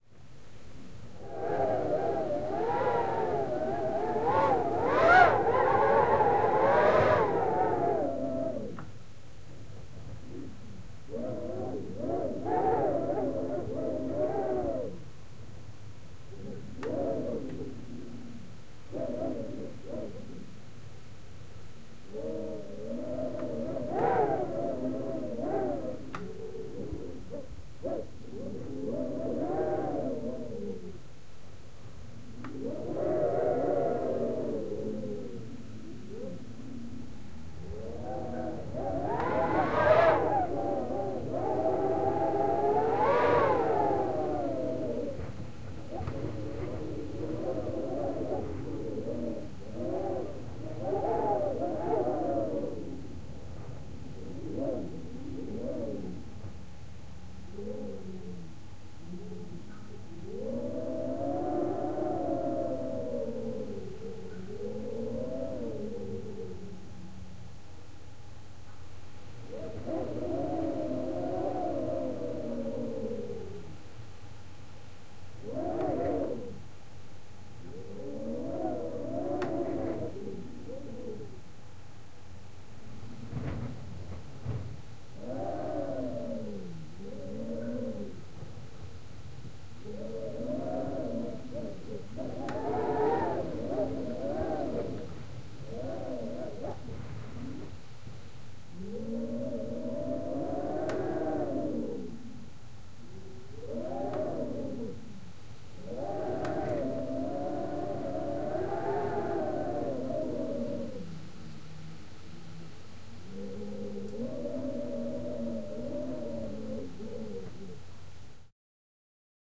AMBLM wind interior howling stronger
Wind howling through the window of a 12 m2 room. First it's pretty strong, then it goes softer.
Close-miked with a Zoom H4n.
cold, eerie, horror, howling, interior, piping, room, soft, weather, whistling, wind, winter